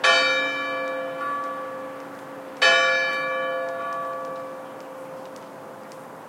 Church Clock Strikes 2
The church bell strikes 2 oclock